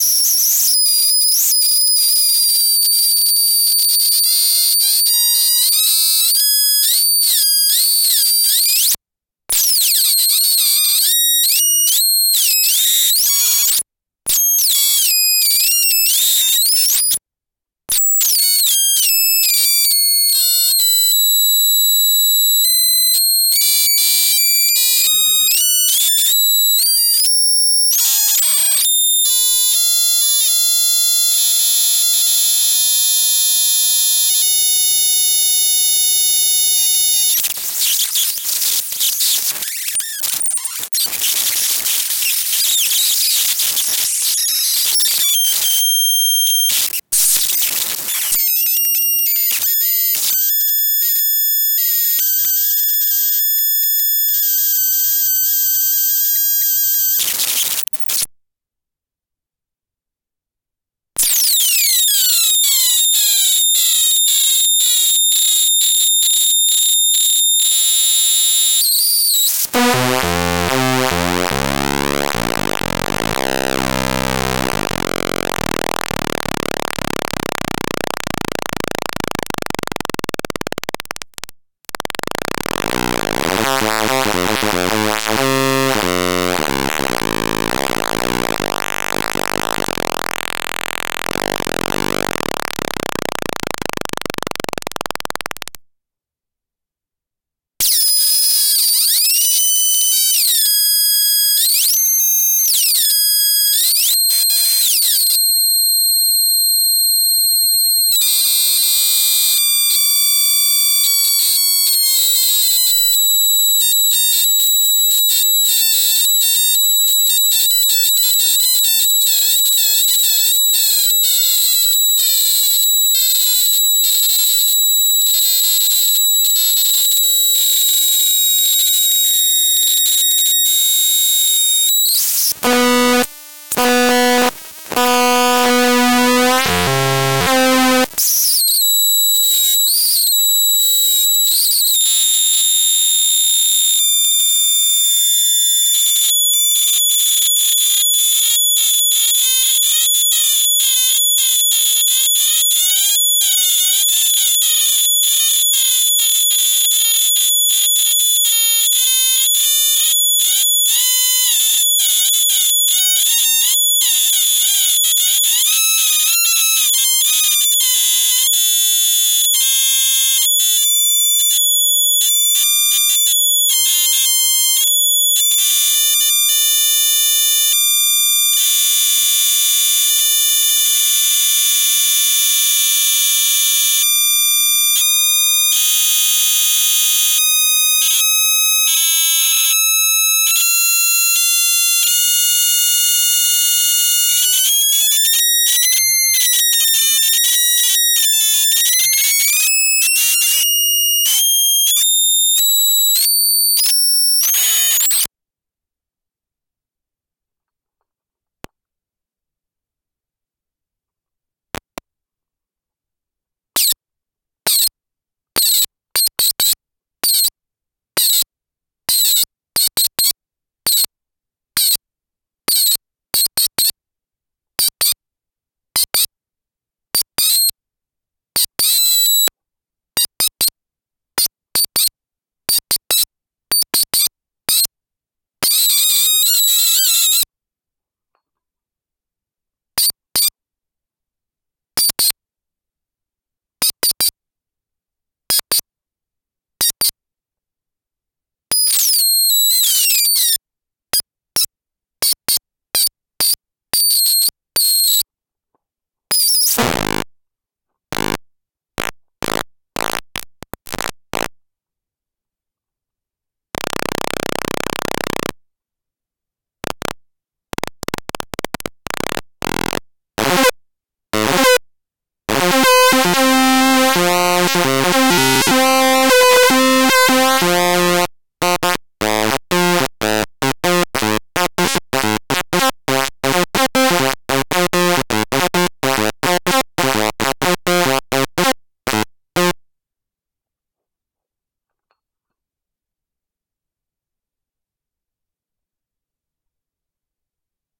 Mario APC
Just jamming on my APC. Making broken sounds.
apc, atari, atari-punk-console, broken, chip, chipbending, circuit, circuit-bending, console, databending, glitch, punk, raw, retro